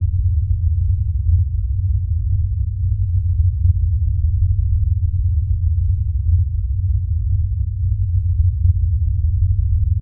noise.deep.loop
Low frequency noise.